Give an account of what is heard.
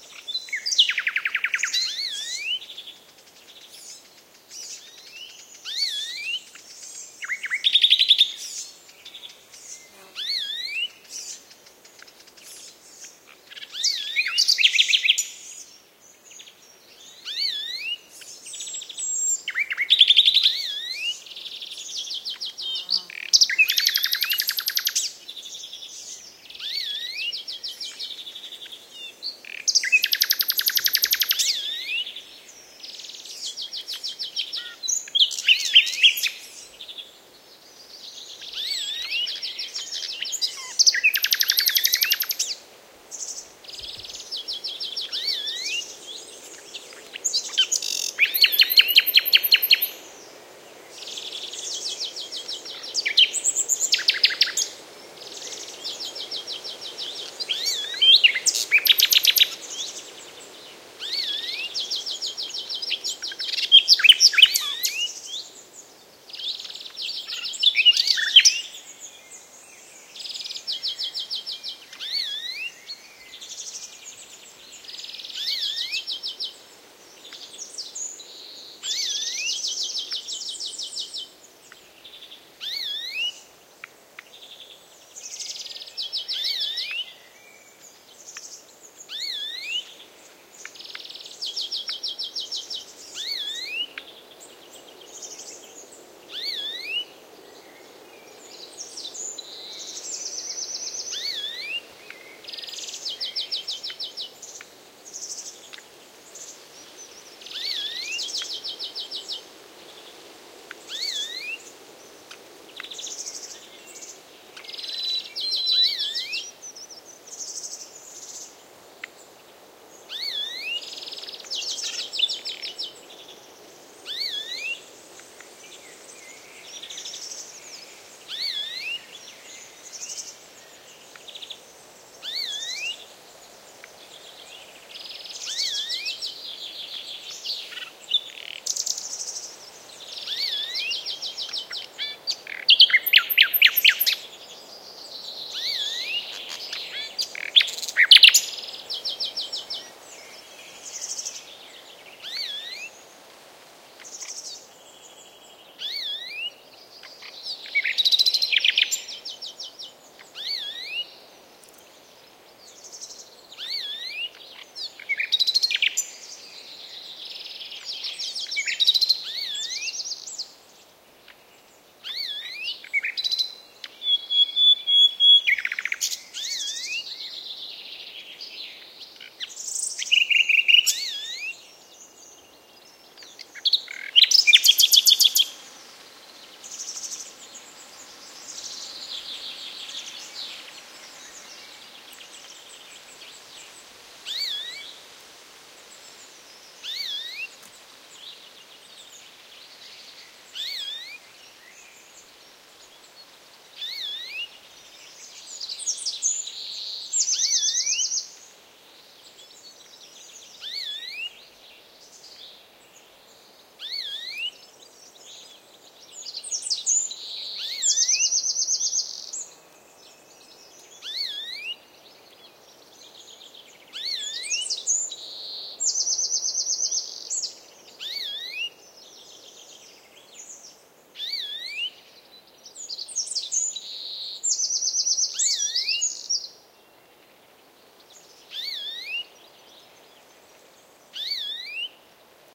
Spring pine forest ambiance recorded around 10am near Hinojos, S Spain. Nightingale, Serin and Chaffinch among other birds, soft wind on trees and some insects flying. The bird in this sample does not perform spectacularly (maybe because the weather was relatively cold all through May, with temperatures 10C lower than usual) and as a result it mixes nicely with the songs of the other bird species (as a rule Nightingales beat other species only too well, which I dislike). Sennheiser MKH30+MKH60 into Shure FP24 and Edirol R09 recorder.